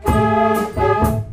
Groovy in a strange way.